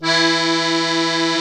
real master accordeon
accordeon, instrument, master